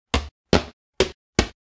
PasosGrandes Sound WET
Steps of a big monster